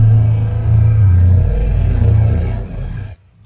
Granular. Pitch falls and it fades out
Sampled didge note (recorded with akg c1000s) processed in a custom granular engine in reaktor 4
granular, reaktor